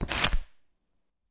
stereo recording of quickly flipping through a 125 page bookvariation 8